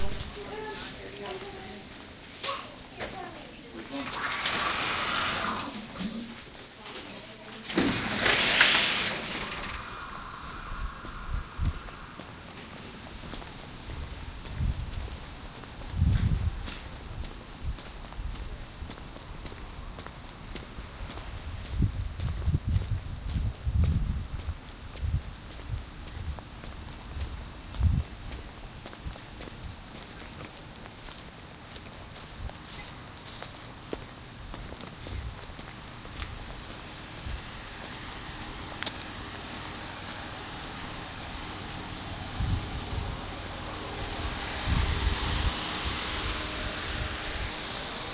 Walking out of the hospital emergency room recorded with DS-40.